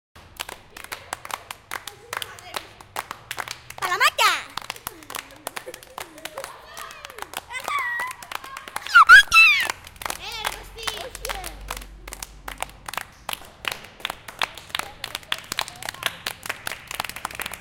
Sonicsnaps from the classroom and the school's yard.